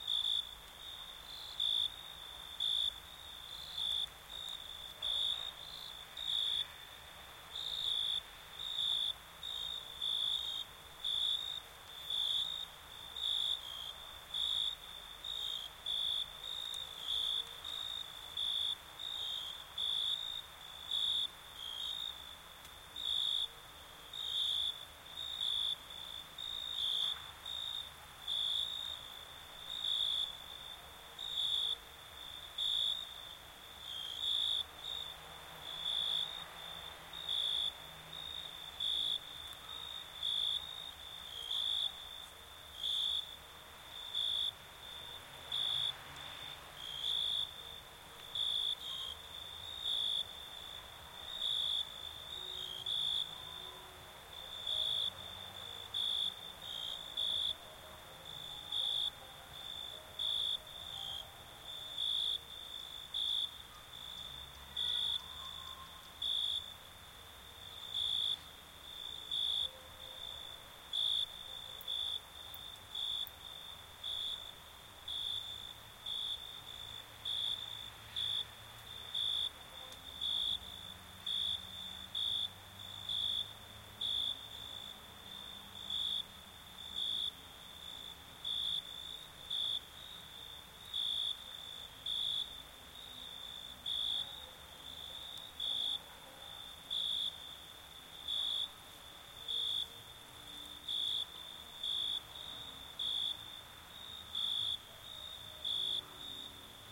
cricket night ambience lebanon pine forest
A stereo mic recording of crickets
forest, night, cricket